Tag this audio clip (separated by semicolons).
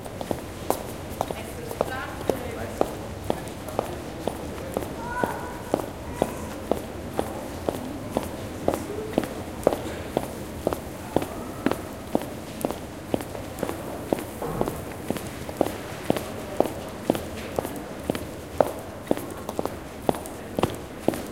steps; energy; shopping; regular; center